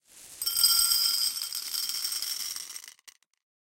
Bag of marbles poured into a small Pyrex bowl. Glassy, granular sound. Close miked with Rode NT-5s in X-Y configuration. Trimmed, DC removed, and normalized to -6 dB.